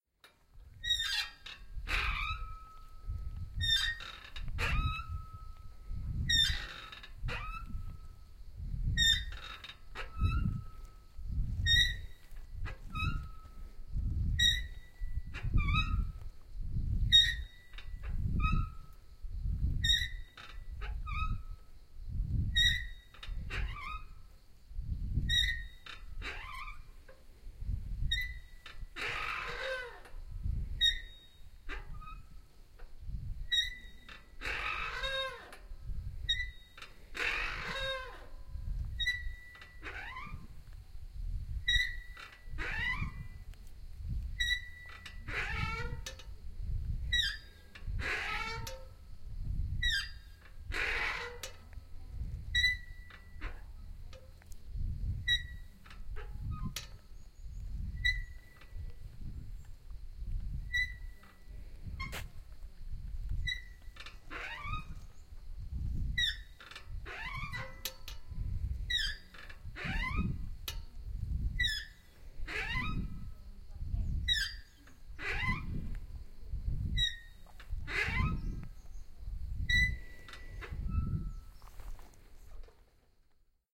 Binaural field-recording of myself swinging in one of those typical iron-made swings built in the eighties.
metal, swing, field-recording, binaural, oxide, columpio, torras, iron